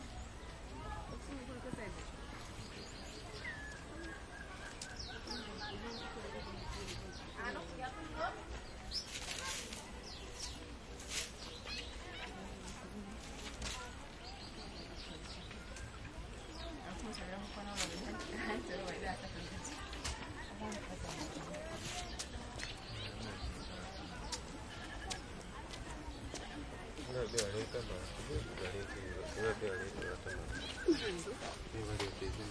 village calm short movement voices Putti, Uganda MS
MS, Putti, movement, village, voices, short, calm, Uganda